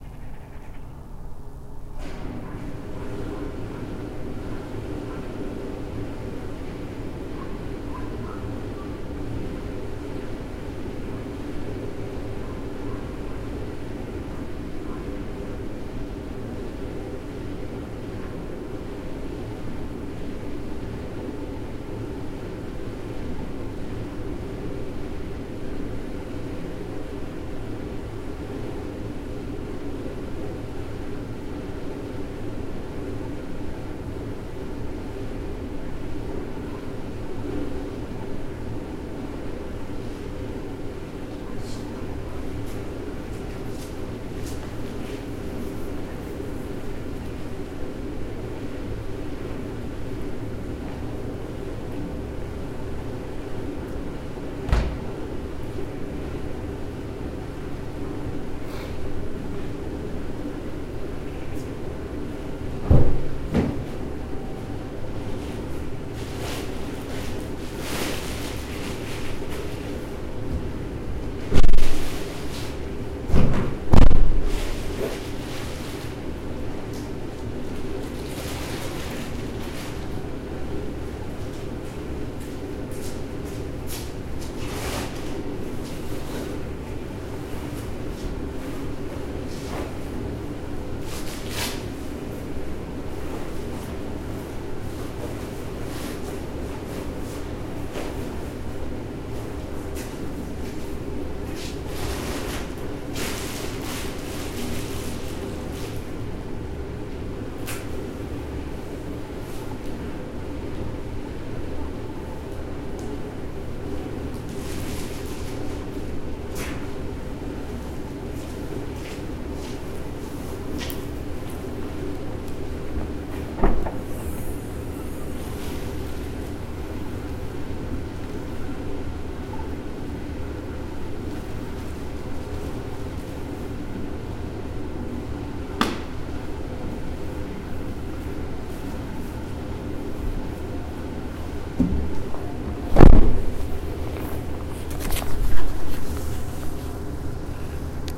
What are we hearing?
industrial agitator recording
An industrial size agitator recorded in a large machinery room. Some footsteps and dragging sounds can also be heard. Recorded on tascam dr-05
agitator, field-recording, industrial